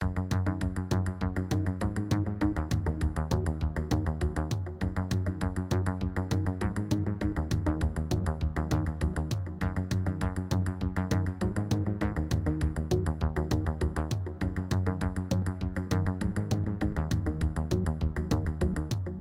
The Plan - Upbeat Loop (No Voice Edit) Mono Track
This is a shorter loopable version of my sound "The Plan - Upbeat Loop".
The voice has been removed.
It has better seamless looping than the original.